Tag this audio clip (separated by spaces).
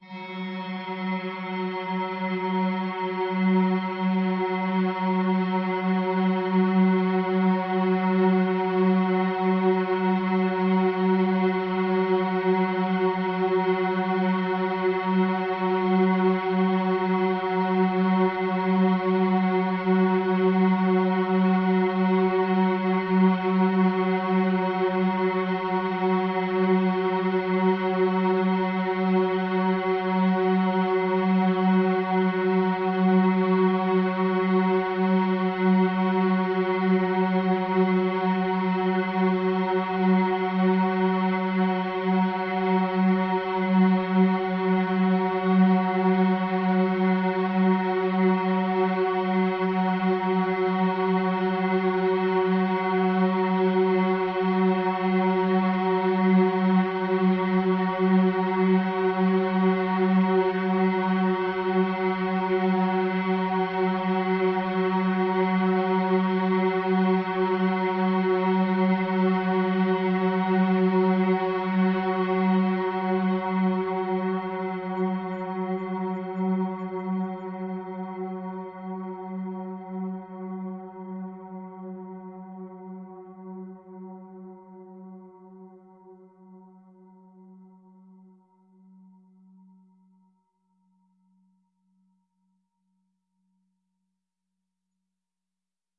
multisample ambient pad drone